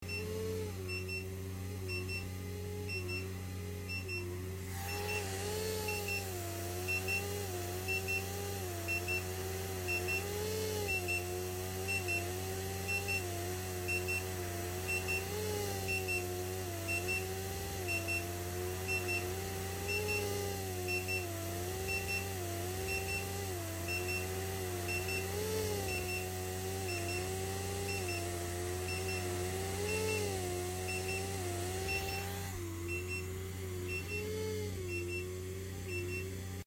The dying droid by Tony
This is audio I recorded of my husband using his ten year old decrepit Constant Positive Air Pressure sleeping machine. I was trying to convince him that he needed a new one. The variety of sounds he and it managed to make is extraordinary. The intermittent beeping is the machine's warning sound.
When I played it for him he cried laughing. I hope you find it useful for something.
You'll be please to know his new machine is whisper quiet.
alien, bed-recording, beeping, breathing, CPAP, rumble, sad, toot, wheezing